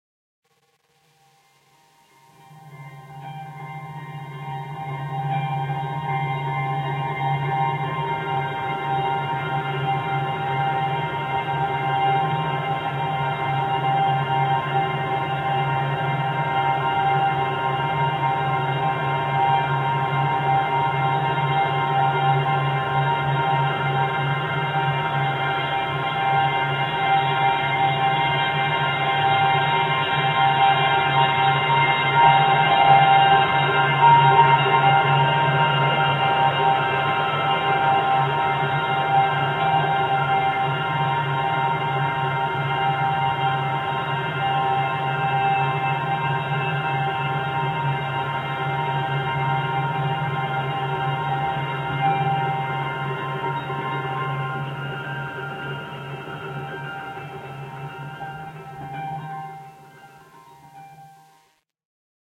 With midi instruments i Logic Pro I created some samples. Bits of sounds and small melodies. Mostly piano and bass. I run some sounds trough Scream Tracker 3 and made more melodi sounds. The samples i got from this was in the end processed in Sound Hack using convelution blending to files together.